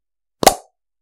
Lid Flip/ Pop - 5
Plastic lid being flipped/ popped off.